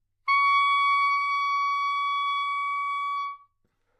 Sax Soprano - C#6
Part of the Good-sounds dataset of monophonic instrumental sounds.
instrument::sax_soprano
note::C#
octave::6
midi note::73
good-sounds-id::5601
Csharp6, sax, single-note